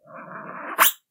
Synthetic Noise - 2
Made using a ridged piece of plastic.
animal, digital, effect, electric, electronic, industrial, machine, metal, robot, sci-fi, strange, synth, synthetic, zip